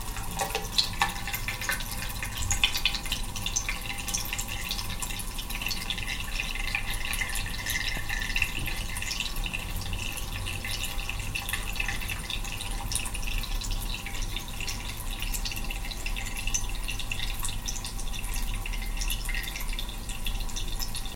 Water leaves a thin stream into overflow hole.